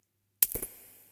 Shell Casing 3
Various Gun effects I created using:
different Snare drums and floor toms
Light Switch for trigger click
throwing coins into a bowl recorded with a contact mic for shell casings
casing, shell